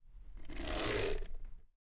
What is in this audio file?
A Lego Technic bulldozer rolling on a wooden surface. It's a pretty cool sound and would probably be a good sound effect if you add some reverb for instance.